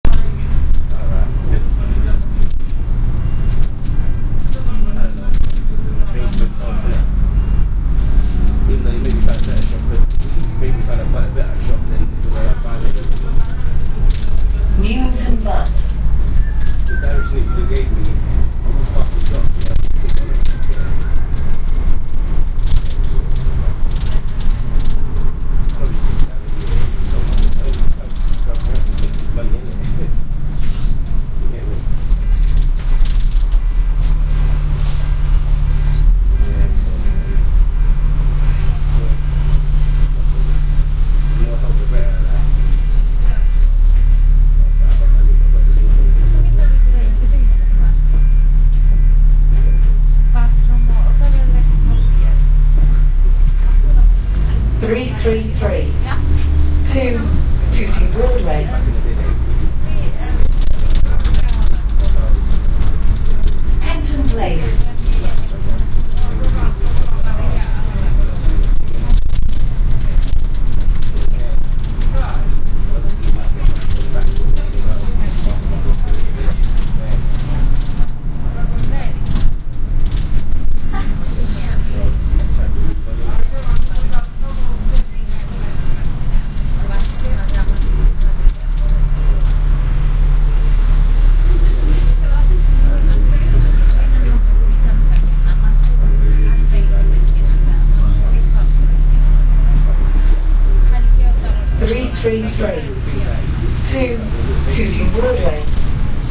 Elephant & Castle - Short bus journey
ambiance, field-recording, general-noise, ambient, soundscape, background-sound, london, atmosphere, ambience, city